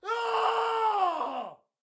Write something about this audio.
Battle Cry 2
Microphone Used: SM58
DAW Used: Reaper
Objects Used: Simply Recorded a friend of mine shouting into the microphone, microphone used popshield and used limiter and compression to avoid peaks
Battle, Cry, english, Male, Scream, Shout, vocals, voice